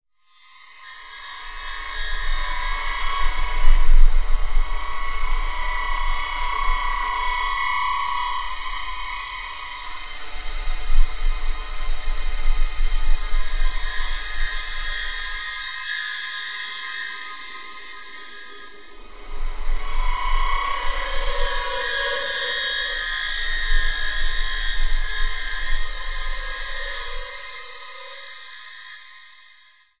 I processed a ringtone that I made with a granular resynthesis application. Ambient Grains.